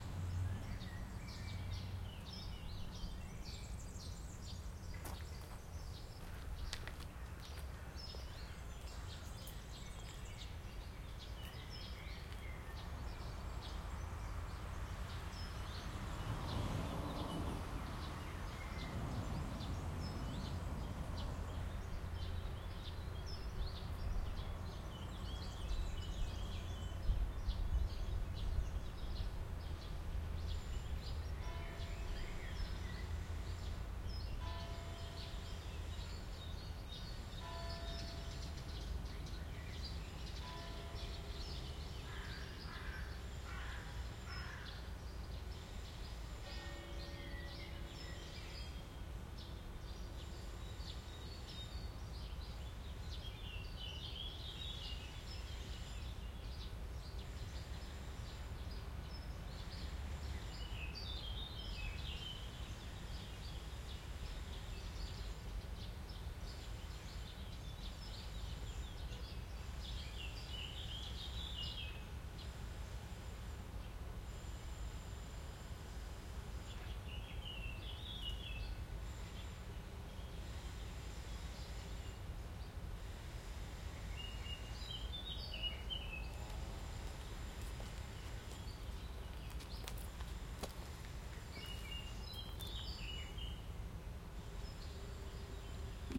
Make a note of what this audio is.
park birds church bells atmo XY
Unprocessed recording of park/forest ambience in a small town. Church bells in distance.